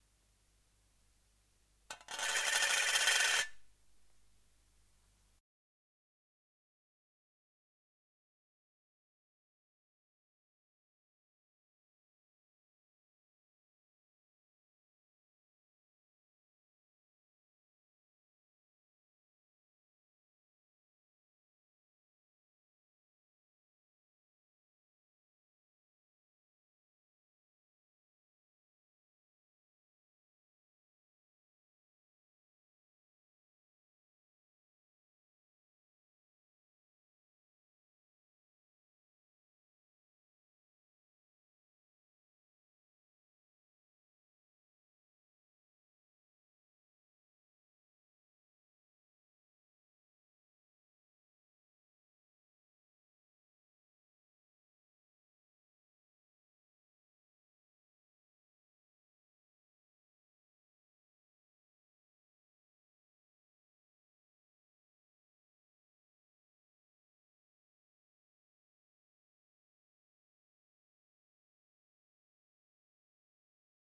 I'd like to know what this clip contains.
spun a coin in a shallow tin cubic container